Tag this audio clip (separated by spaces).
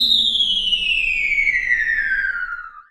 Whistle Dropping Falling Bomb